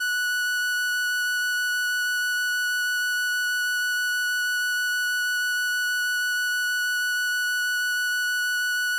Transistor Organ Violin - F#6
Sample of an old combo organ set to its "Violin" setting.
Recorded with a DI-Box and a RME Babyface using Cubase.
Have fun!